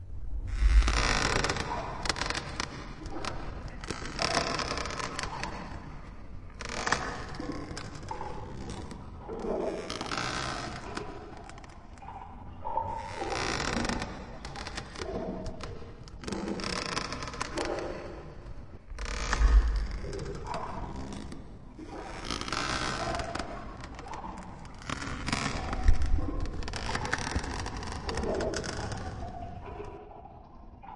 Added more stuff and pitched down ghost ship sound.